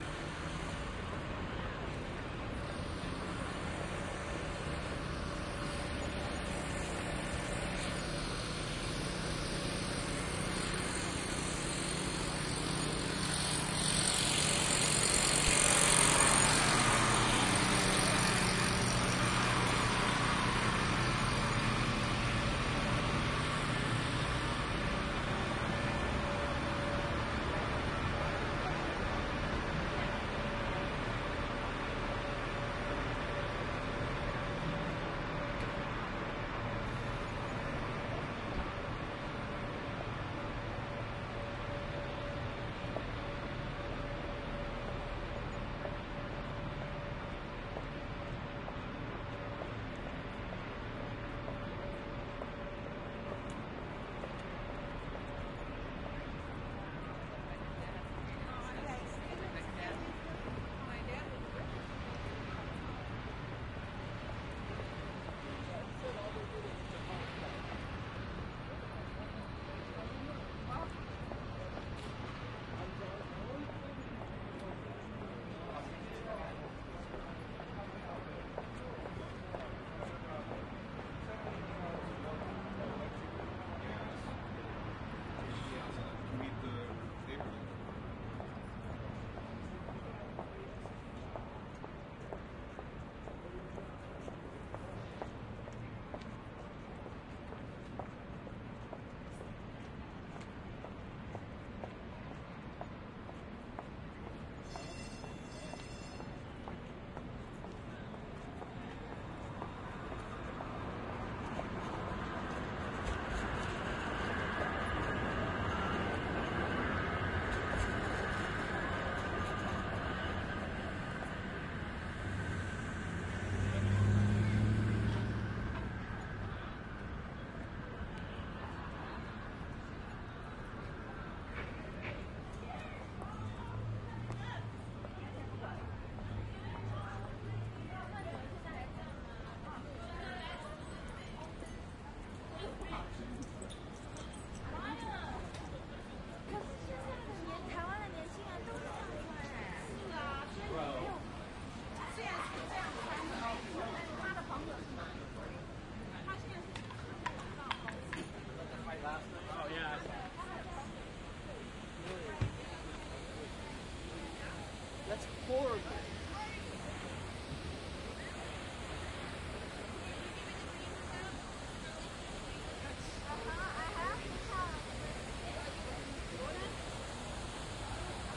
walking to pioneer square
Walking toward Pioneer Square, at first there is some loud construction, at 1:50 you can hear a train go past, then various people talking. Recorded with The Sound Professionals binaural mics into a Zoom H4.
city, sonography, traffic, noise, binaural, field-recording, walking